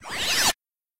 Spacey Quick 2
sci fi flourish - a quicky alt
science, laser, sci-fi, alien, science-fiction, teleport